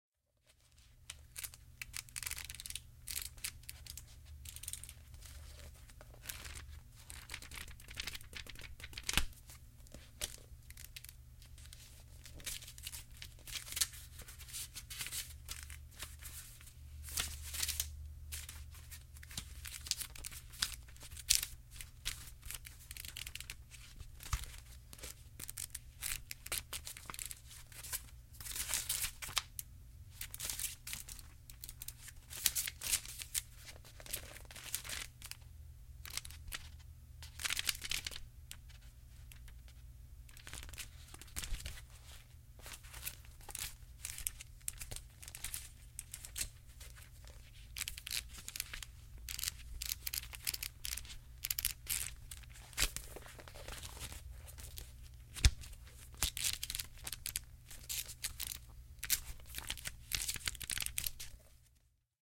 Magizine Pages
Recording of person flipping through a magizine.